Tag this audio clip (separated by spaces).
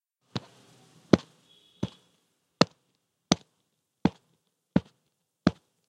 slam footsteps walking